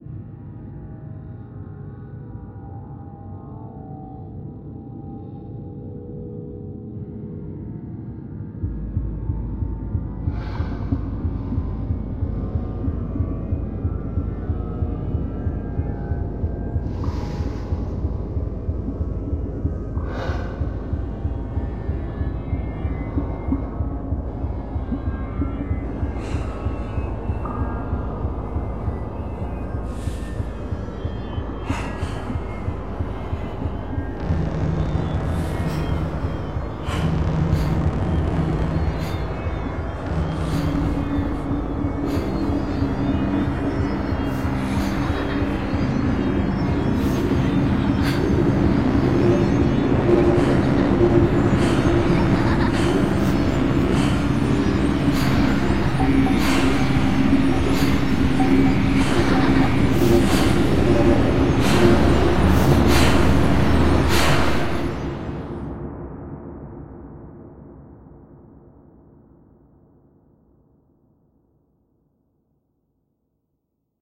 Decayed Breath
Sound design for short film on decay theme. Dark synth/laughs/breaths.
breath, Dark-synth, laughs